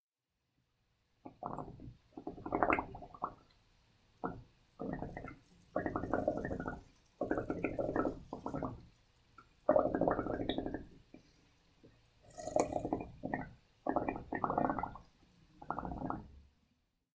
bubbles with straw
me making bubbles with a straw